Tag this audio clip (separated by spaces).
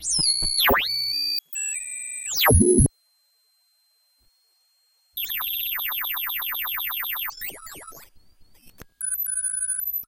glitch bending toy phone turkish circuit